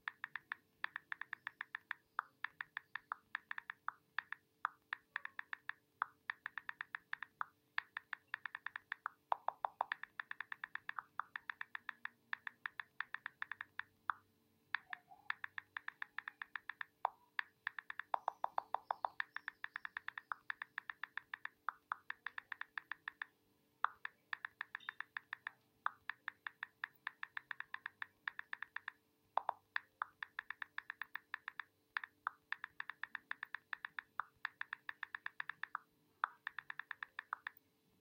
iPhone typing sound.
iphone, keyboard, phone, smartphone, typing